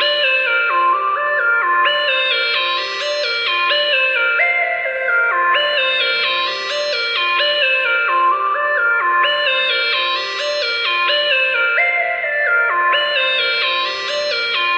A Random Loop #1
A random loop made in FL Studio.
2023.